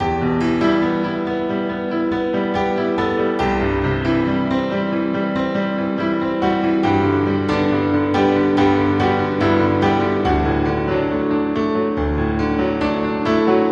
Piano 8 bar 140bpm *1
an 8 bar that could loop. Thought I'd record at 140 bpm and see if it could sneak into someones dubstep track